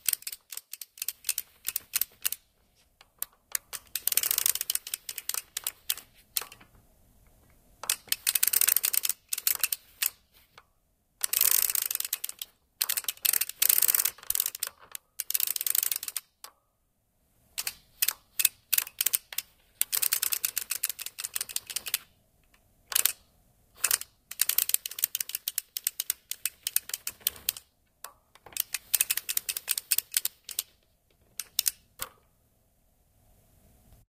Clicking Dial Barrel Spin
A futuristic barrel clicking sound I created using an ipod holder then tweaked in Audacity.
Thank you
fishing-reel, dial-setting, clicking-sound, toy-clicking, magazine-clicking, Gun, robotic-clicking, module-clicking, Action, Clicking, fishing-pole, Firearm, Barrel